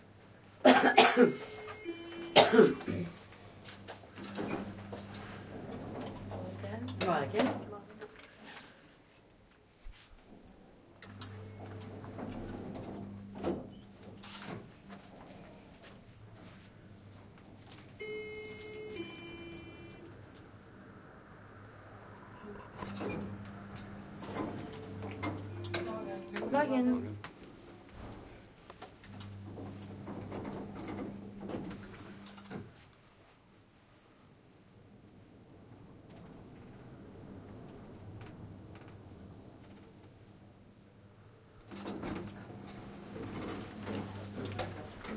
ascensore germania
elevator with people coughing (Park inn Berlin Alexanderplatz)
Park, inn, Alexanderplatz, elevator, people, coughing, Berlin